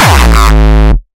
Hardcore Kick 1
A single Hardcore Kick. A looped version is available in this package.
use it anyway you want but i would appreciate a note when and where you use it (but its not required).
made from ground up and optimized for 175 BPM
hard, distorted, hardcore, kick, kickdrum